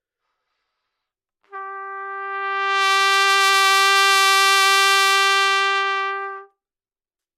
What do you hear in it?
Part of the Good-sounds dataset of monophonic instrumental sounds.
instrument::trumpet
note::G
octave::4
midi note::55
good-sounds-id::2923
Intentionally played as an example of bad-dynamics-errors